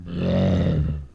Zombie Roar 8
Recorded and edited for a zombie flash game.
zombie, undead, roar